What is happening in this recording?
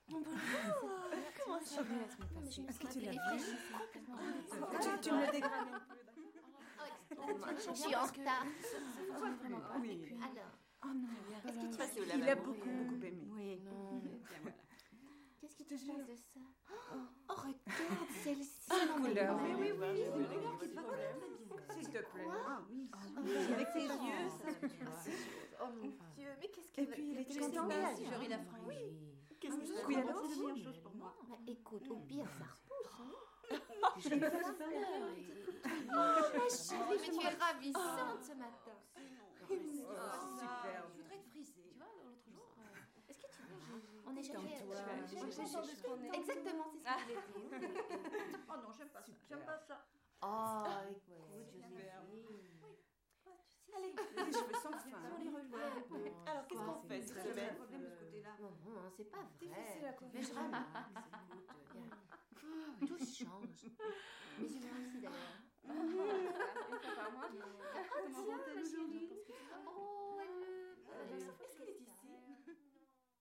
Interior vocal (French) ambiences: in the company of ladies